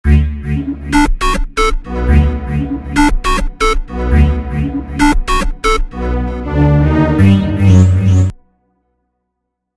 Dark Ruler
The Devil Managing a Key Board.Recorded at 118bpm.